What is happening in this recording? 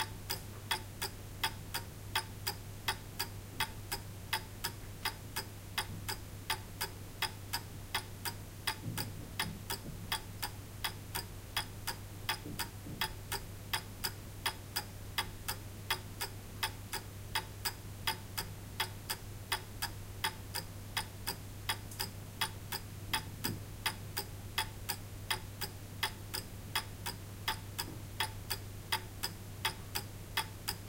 MCE 72, Battery
To test some microphones I used the following setup:
Quadmic preamplifier with HiGain on , set on a medium setting.
iRiver IHP-120 recorder, Gain on 20. (rockbox)
Distance clock to microphone: 30 cm or 1 ft.
In the title of the track it says, which microphone was used and if Phantom power or the battery were used.
Here: Beyerdynamic MCE 72.
microphone, clock, microphones, testing, test